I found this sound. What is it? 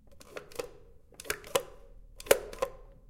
Bathroom soap dispenser.